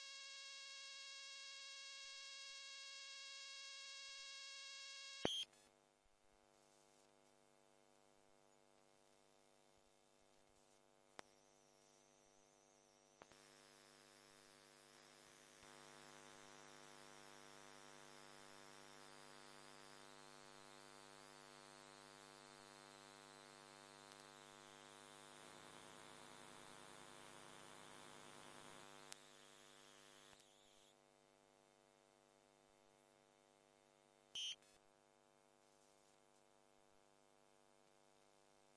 Electrical Noise
Recorded with Zoom H4n connected to a telephone mic pickup. un-processed no low or high cut.